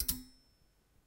stand lift 1
experimental, metallic, percussion